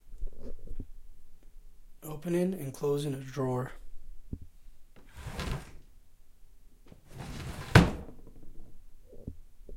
Closing and opening a drawer
recorded with a condenser mic, closing and opening drawer.
close, drawer, open